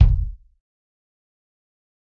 Dirty Tony's Kick Drum Mx 079
This is the Dirty Tony's Kick Drum. He recorded it at Johnny's studio, the only studio with a hole in the wall!
It has been recorded with four mics, and this is the mix of all!
realistic, kit, punk, kick, dirty, tonys, drum, tony, pack, raw